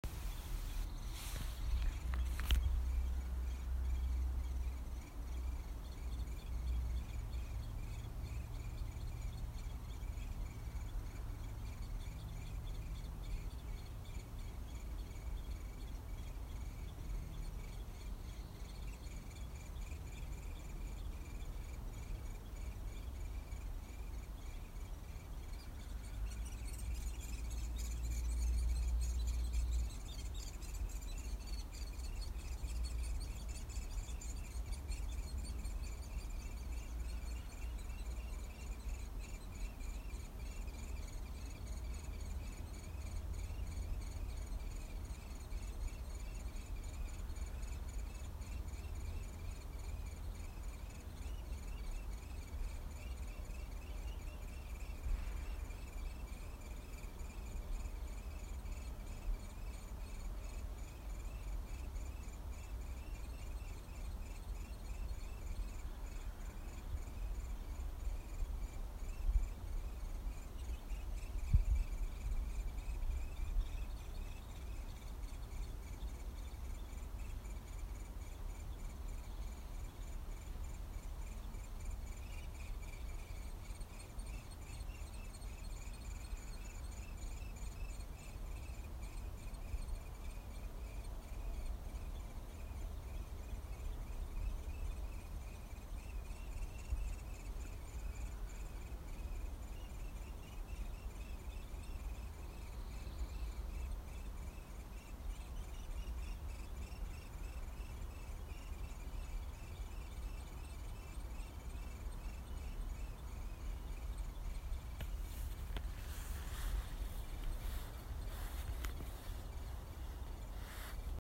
Downy woodpecker chicks. May 3 2020 41047 PM
Downy Woodpecker chicks in spring, May 3, 2020. Audio recorded for 25 feet below the hole in the tree from the ground. Woodsy trail is in Hope Woods in Kennebunk, ME.